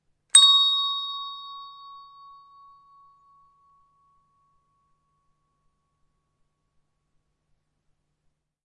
Hand Bells, C#/Db, Single
A single hand bell strike of the note C#/Db.
An example of how you might credit is by putting this in the description/credits:
The sound was recorded using a "H1 Zoom V2 recorder" on 15th March 2016.
D-flat, single, instrument, hand, c, db, bells, bell, percussion, bright, flat